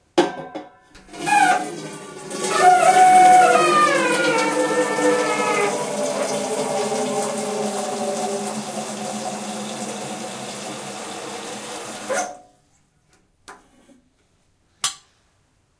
Kettle fill
filling the kettle
household, kettle, kitchen